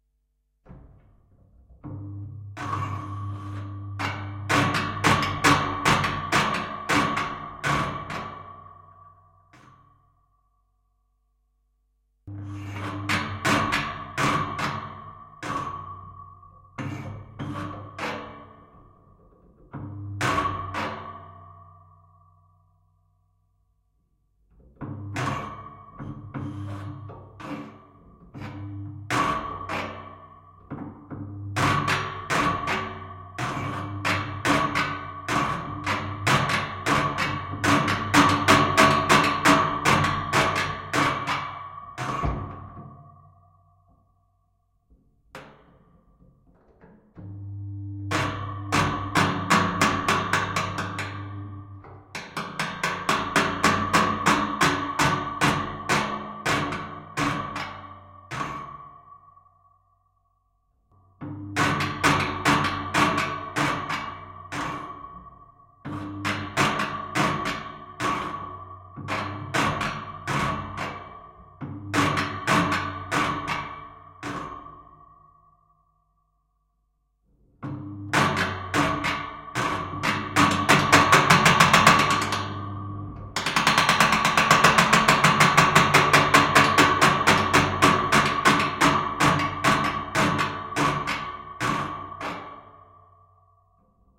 Old Fan Stereo Contact Mic 'unprocessed Blade hits the fan.
Contact, Fan, Mic, Old